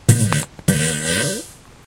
fart poot gas flatulence flatulation explosion noise weird
fart, flatulation, noise, explosion, gas, weird, flatulence, poot